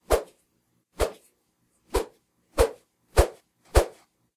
This is the whiffing sound of a thin plastic arm whipping through the air. There are several versions in this one file. Recorded with a Zoom H4.
whiff, whip, air